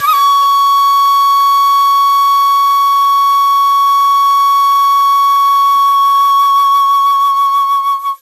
Flute Dizi C 085 C#7
Flute Dizi C all notes + pitched semitones
C, Dizi, Flute